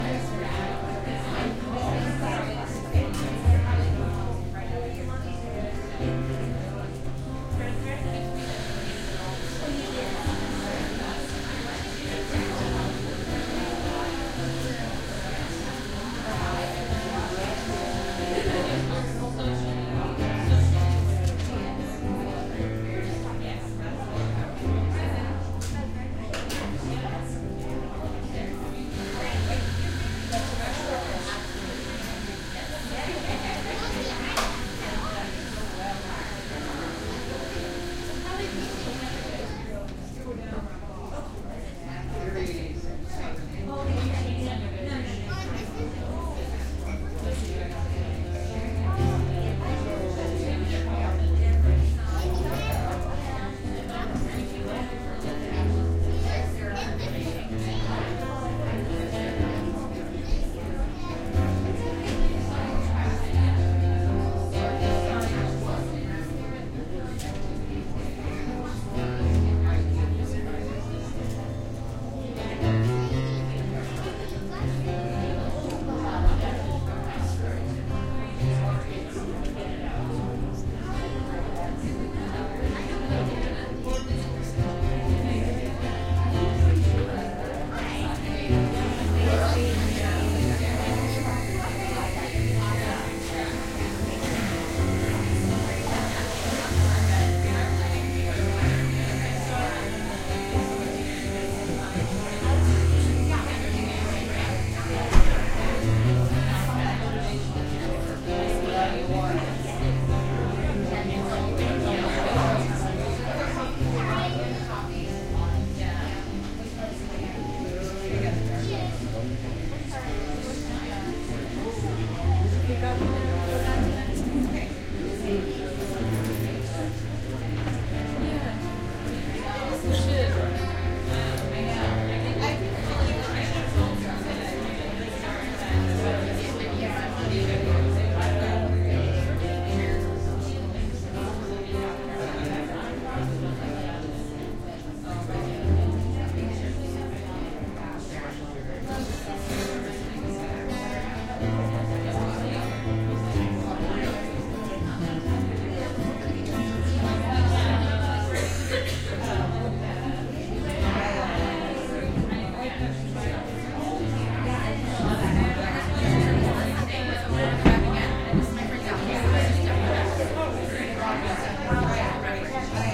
Busy Coffee Shop, Live Acoustic Guitar Music
The interior of a small coffee shop filled with people. Someone plays soothing acoustic guitar in the corner. Children play, students type on their laptops, baristas prepare hot drinks.
coffee, coffee-shop, crowd, guitar, walla